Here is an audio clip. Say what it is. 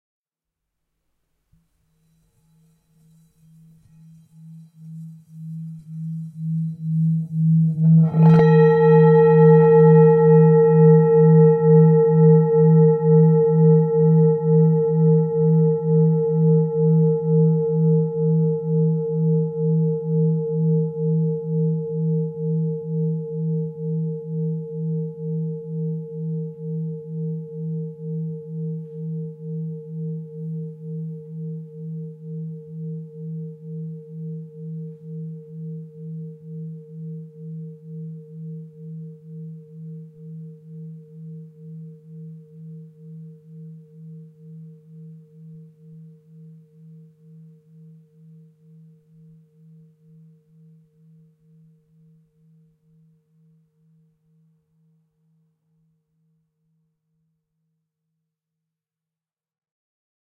TIBETAN BOWL - 1
Sound of traditional Tibetan singing bowl. Sound recorded with a ZOOM H4N Pro.
Son d’un bol tibétain traditionnel. Son enregistré avec un ZOOM H4N Pro.
meditation, tibetan-bowl, dong, temple, zen, nepal, asian, singing-bowl, tibetan, gong, bol, bell, singing, bowl, buddhism, buddhist, ringing, asia, tibet, ring, harmonic, ding